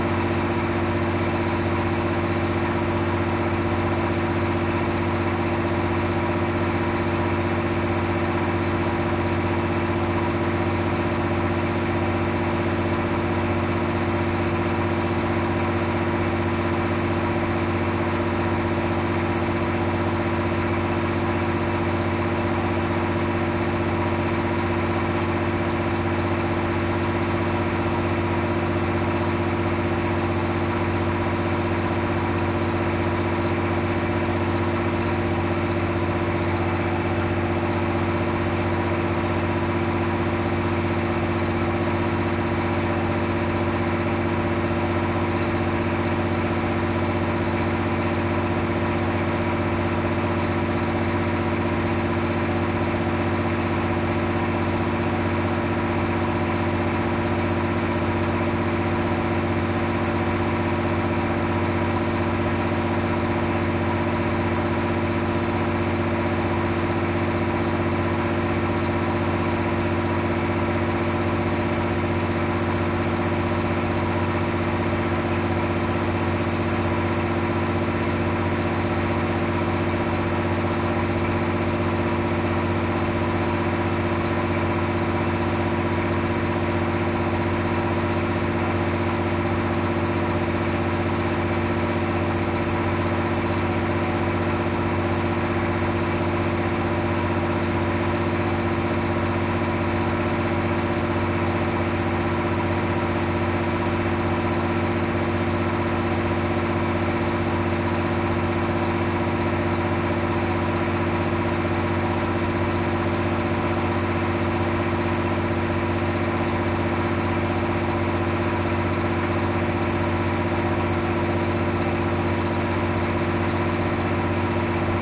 boat Amazon ferry 2-deck diesel barge onboard loud3 engine
boat Amazon ferry 2-deck diesel barge onboard loud engine